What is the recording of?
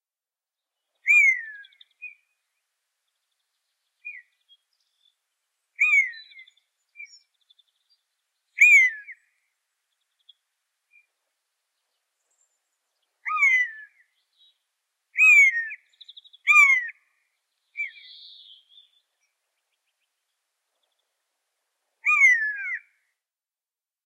A mono field-recording of two Common Buzzards (Buteo buteo) one is very very distant and way off axis. Heavily edited for wind noise. Rode NTG-2 with deadcat > FEL battery pre-amp > Zoom H2 line in.